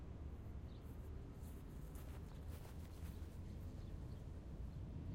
Field recording of 5 steps on the grass approaching and going away. There is the background noise of the city of Barcelona.